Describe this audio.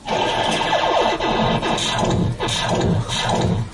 Shooting sounds amusement arcade